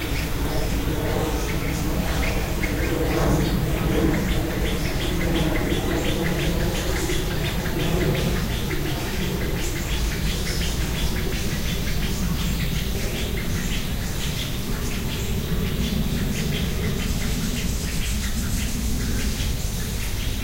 spur winged goose
Distant chirping from a Spur-winged Goose, with many other birds and an airplane. Recorded with an Edirol R-09HR.
tropical, bird, exotic, field-recording, chirp, goose, zoo, birds, aviary